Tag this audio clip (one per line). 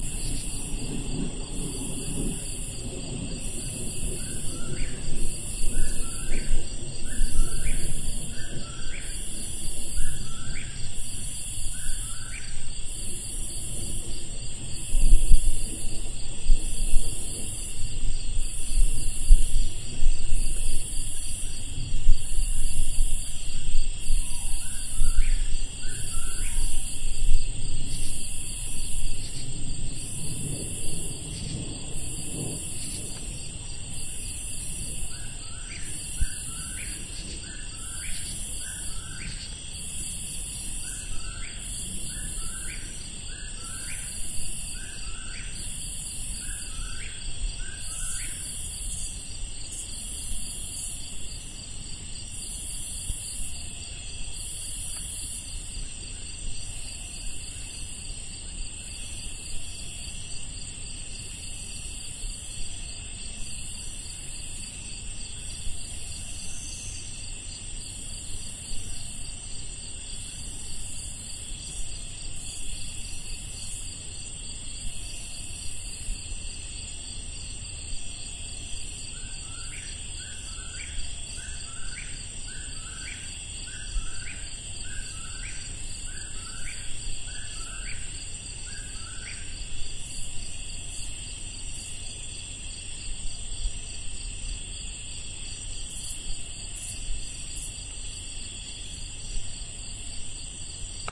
bird
field-recording
forest
nature
whipporwill